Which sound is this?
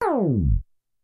tape slow9
Pieces to create a tape slowdown effect. Recommend combining them with each other and with a record scratch to get the flavor you want. Several varieties exist covering different start and stop pitches, as well as porta time. Porta time is a smooth change in frequency between two notes that sounds like a slide. These all go down in frequency.
252basics, halt, porta, roland, screech, slow, stop, tape, xp-10